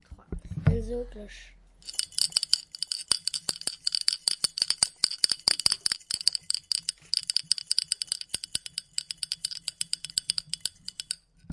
Enzo-cloche
France, mysound, saint-guinoux